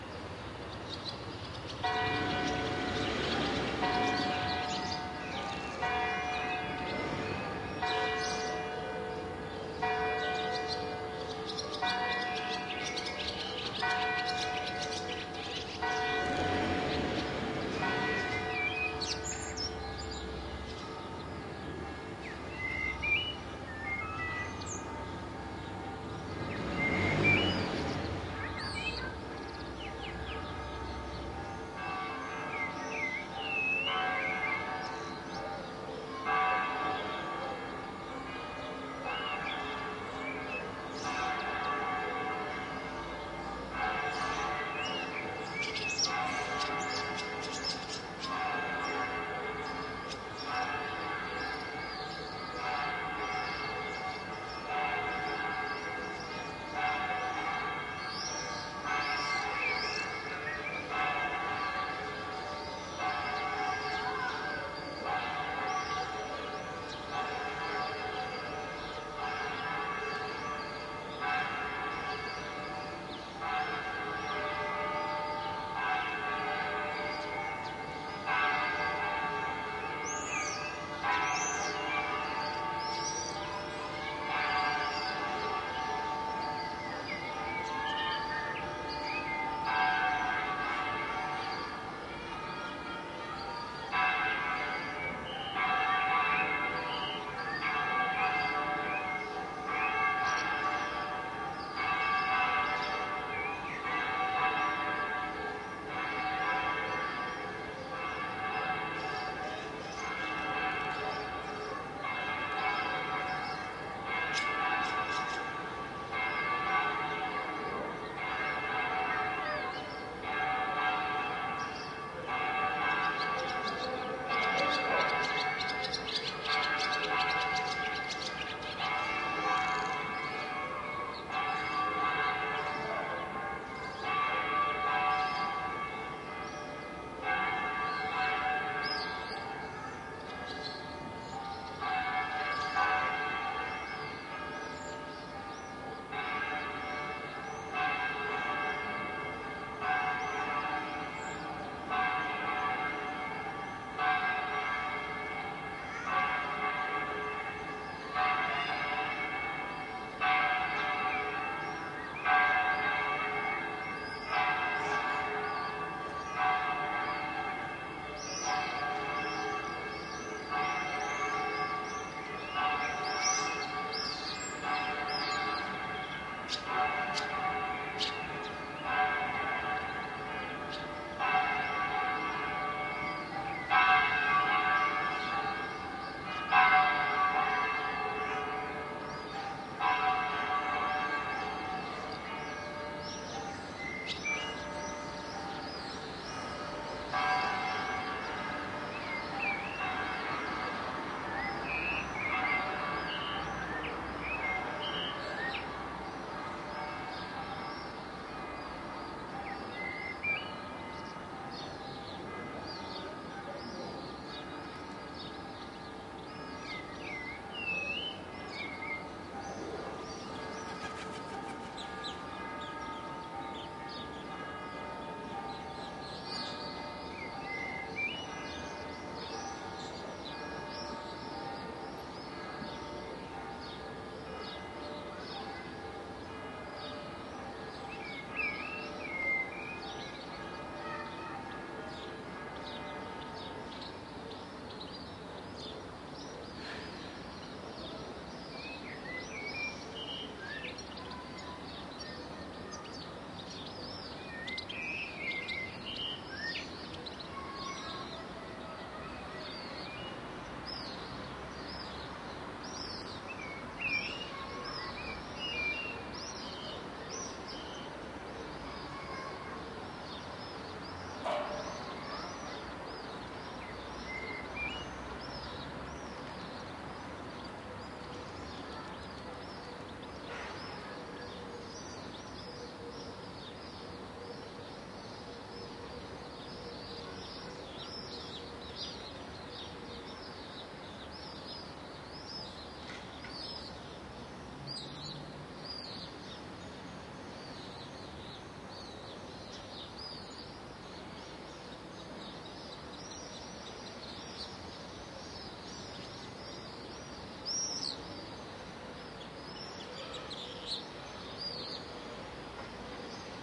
20090419.sunday.morning
sunday morning ambiance in Seville, with singing Blackbird, Swallow, Swift, and ever-present pealing of church bells in background. Not much traffic noise. Sennheiser MKH60 + MKH30 into Shure FP24 and Edirol R09 recorder
ambiance, birds, blackbird, chirps, field-recording, screeching, seville, south-spain, sunday, swift